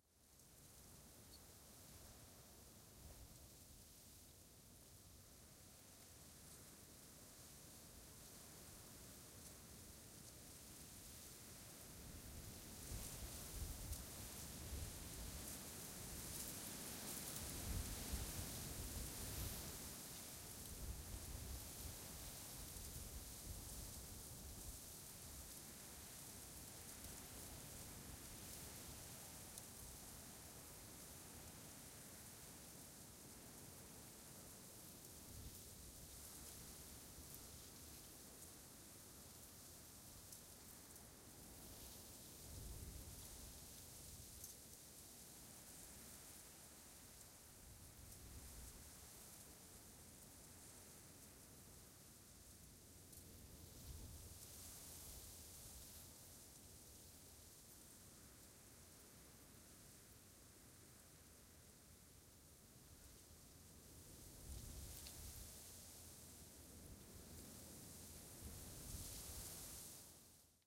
Field ambience 02
Open plough field, wind and noise of dry grass.